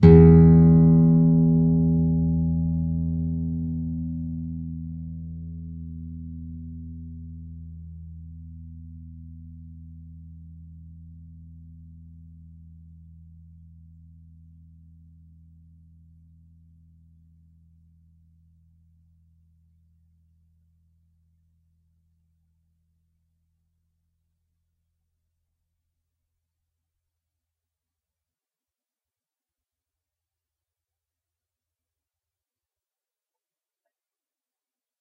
Single note, picked E (6th) string. If there are any errors or faults that you can find, please tell me so I can fix it.